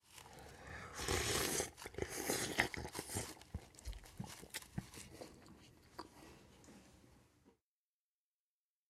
Me slurping noodles
gross, random, slurp